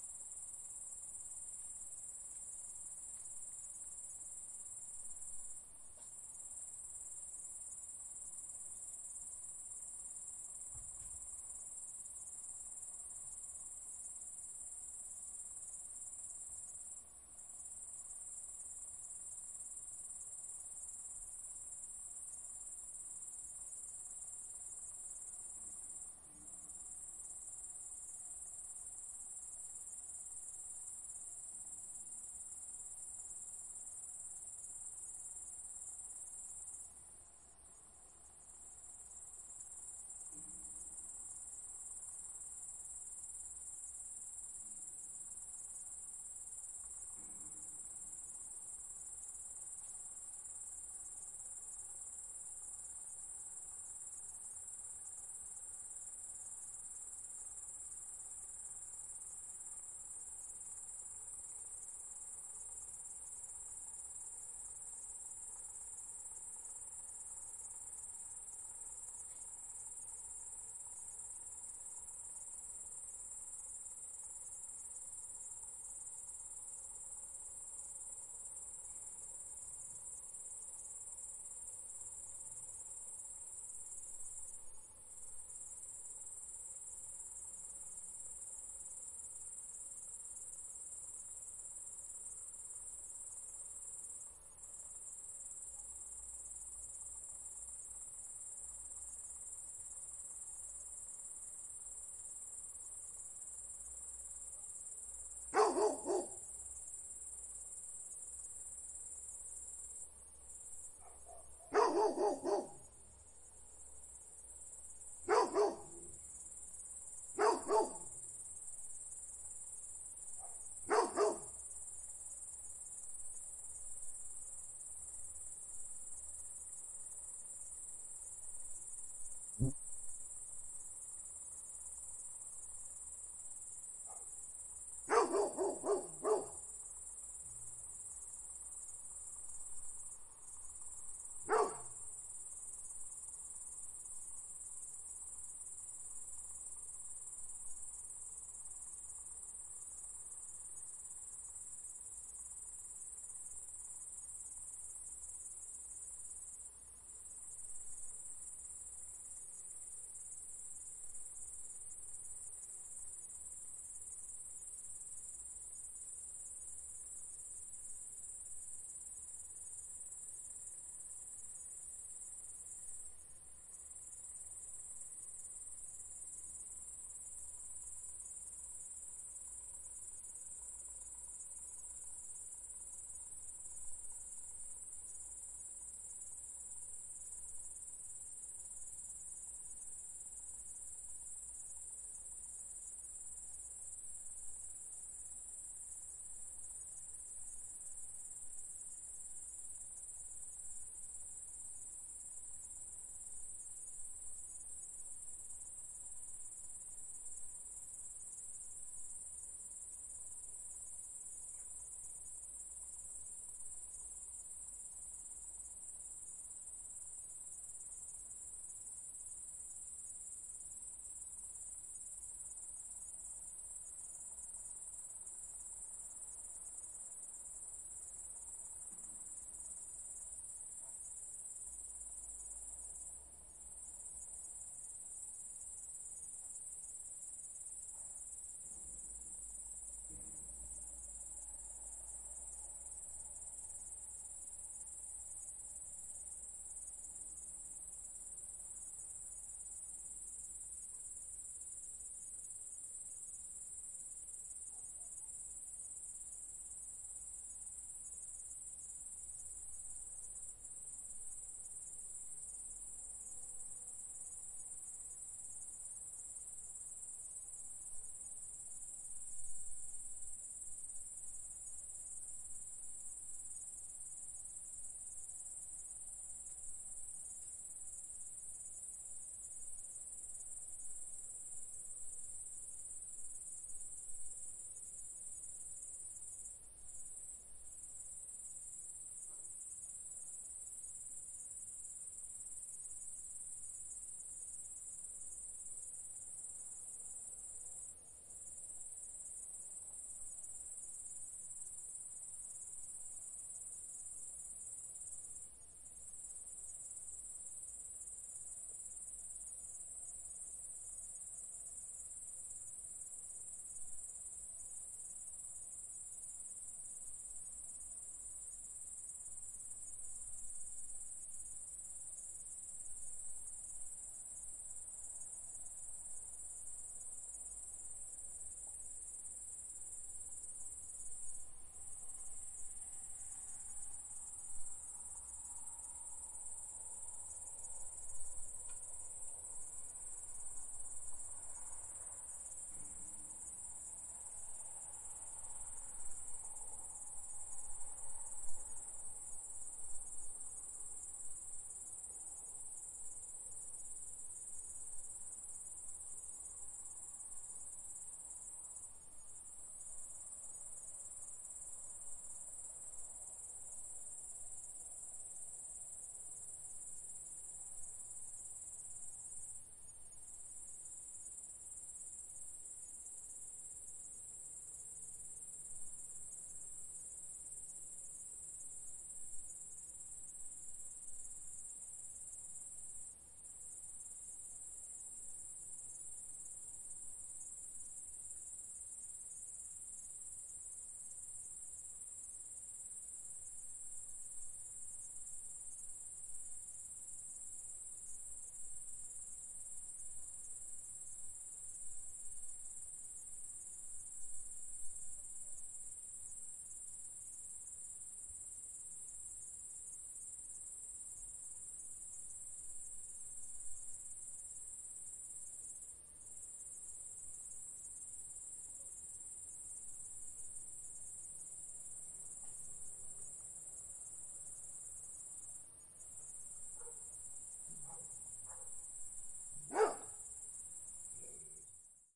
Late summer night in the Northern European (Estonian, to be precise) countryside. Crickets, occasional barking etc.
cricket, nature, night